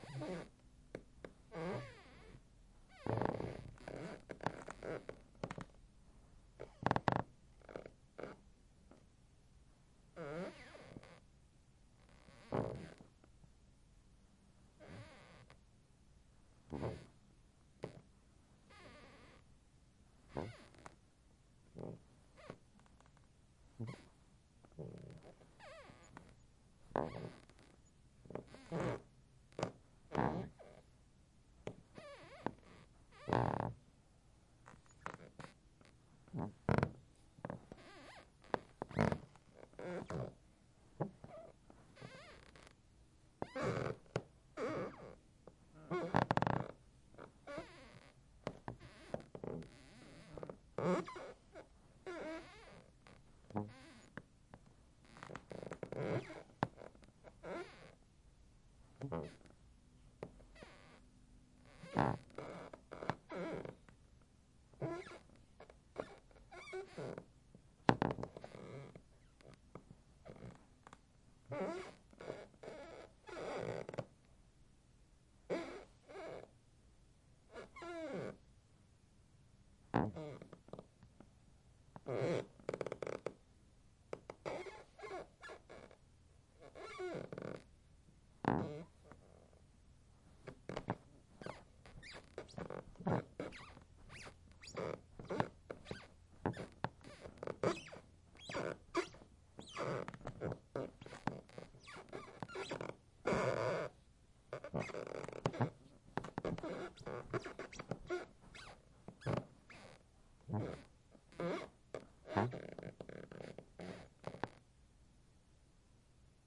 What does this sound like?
CREAKING FLOOR LOCATION 2
(RECORDER: ZoomH4nPro 2018)
(MICROPHONES: ZoomH4nPro 2018 on-board microphones)
Isolated footsteps on a very creaky wood floor in my home. Please notice the other 3 versions recorded at other locations in the same room.
I would love to know if and where you use these! Always fun to know!